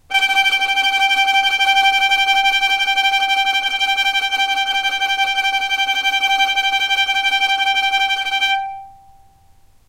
violin tremolo G4
tremolo, violin